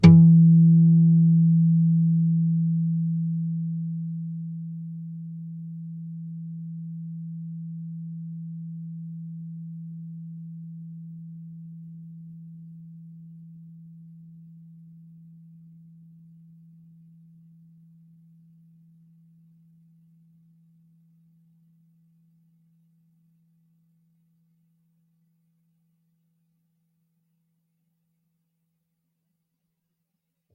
Clean E harm
Single note 12th fret E (6th) string natural harmonic. If there are any errors or faults that you can find, please tell me so I can fix it.
nylon-guitar, acoustic, single-notes, guitar